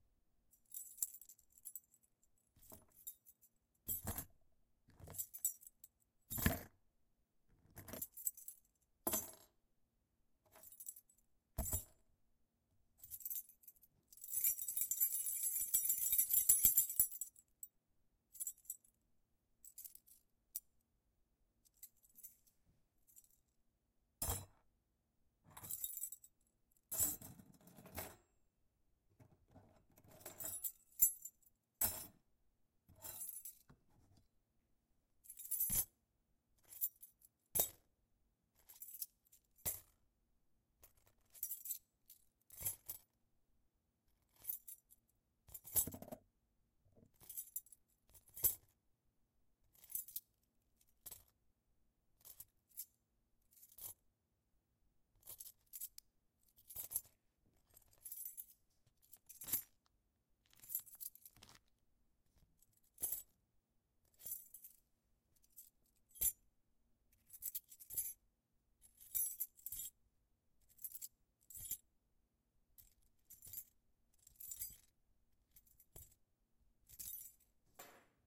Bunch of Keys on a Keychain
I'm playing with a bunch of (4) keys and one bottle opener on one key fob.
jingles, jingle, pockets, lock, metal, tinkle, stum, inventory, thrum, pocket, chink, jingling, key